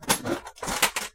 Just open my tool case.